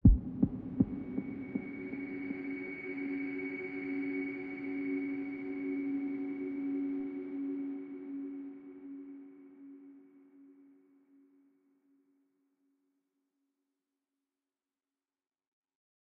Deep kick and tension pad. Made in Ableton, resampled 808 and Absynth with reverb and ping pong delay.